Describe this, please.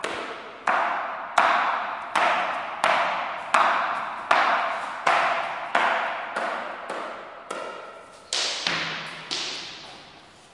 SonicSnap JPPT5 TableTennisBallFloor
Sounds recorded at Colégio João Paulo II school, Braga, Portugal.